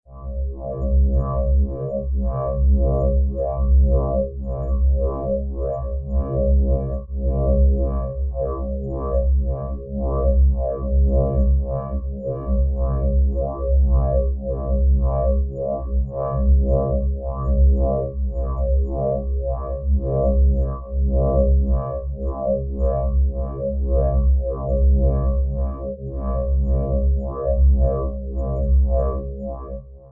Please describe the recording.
SYnth NoisesAT
Even MORE SYnthetic sounds! Totally FREE!
amSynth, Sine generator and several Ladspa, LV2 filters used.
Hope you enjoy the audio clips.
Thanks
Ꮞ